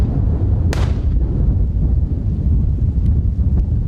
Single shot very windy conditions
Shot taken from a over-and-under shooter during Pheasant shoot in very windy conditions in a deep valley.